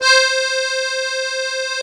real acc sound
accordeon, keys, romantic